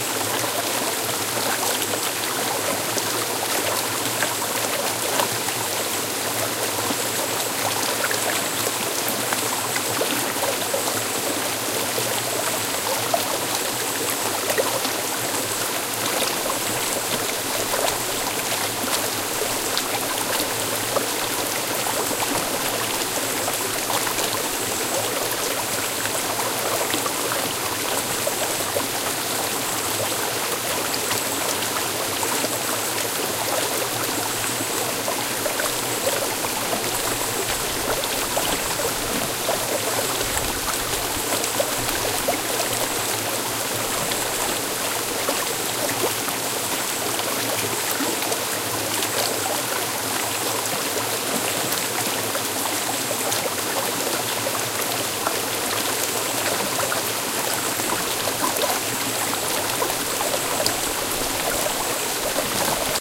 Costa Rica 2 Stream with Insects
ambiance, central-america, costa-rica, field-recording, insects, nature, stream, summer, waterfall